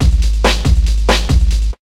This is a fat breakbeat loop which I created with fruityloops.The drumloop is called break2.